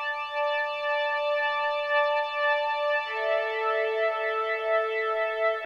High Drone Short
High synth drone pad recorded from a MicroKorg